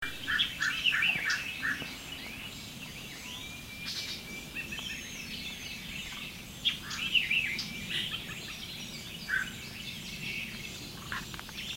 Sound of birds early in the morning.
I recorded this (I think) June 2nd of last year [2011] while walking along a dry creek bed in Middle, TN early in the morning after my first night at Idapalooza.
Recorded with a Sony ICD-PX720.